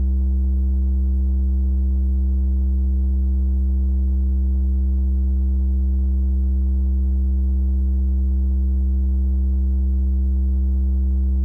Doepfer A-110-1 VCO Sine - C2
Sample of the Doepfer A-110-1 sine output.
Captured using a RME Babyface and Cubase.
raw, Eurorack, modular, waveform, analogue